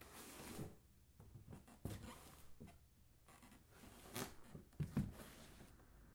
Feet sliding on wood